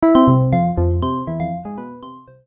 I made these sounds in the freeware midi composing studio nanostudio you should try nanostudio and i used ocenaudio for additional editing also freeware
sfx, blip, intro, game, sound, bootup, intros, click, desktop, application, event, effect, clicks, startup, bleep